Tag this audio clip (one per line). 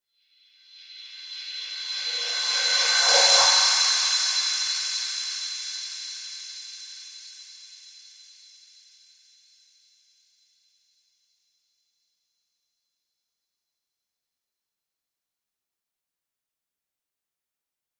echo
fx
reverse
metal
cymbal